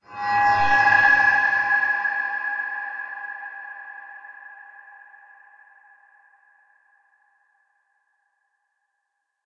A mysterious sound effect that indicates something scary happened